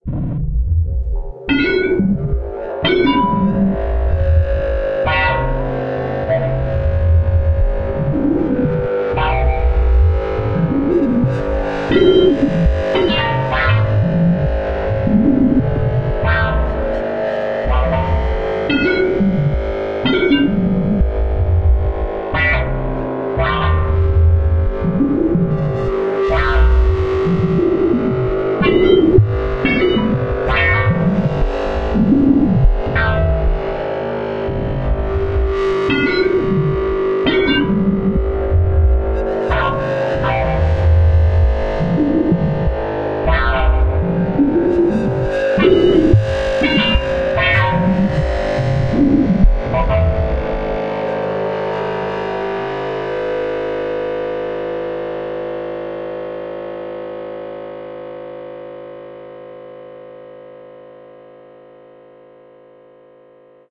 Sonification of collision display data from the CERN Large Hadron Collider. Sonification done by loading an image from the ATLAS live display and processing with a Max/MSP/Jitter patch. This is a remix of three channels (FM, oscillator bank, and filtered noise)